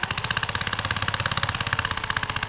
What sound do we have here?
Drill Held Medium 1
Friction, Boom, Plastic, Hit, Bang, Metal, Impact, Smash, Crash, Tool, Steel, Tools